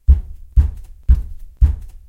walking in a house1
shoes, footstep, walking, steps, footsteps, walk, floor